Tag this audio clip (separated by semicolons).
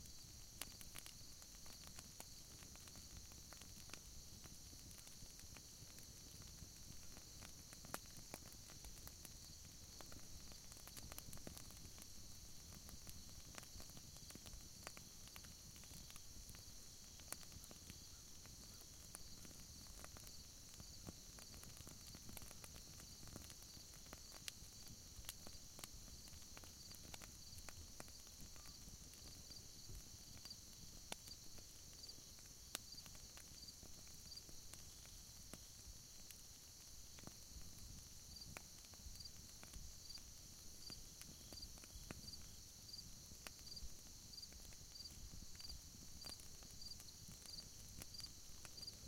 snap; crackle; night-time